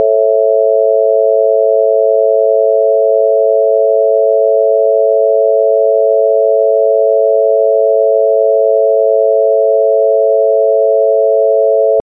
A major triad shifting out of just intonation (into equal tempered intonation) and back into just intonation.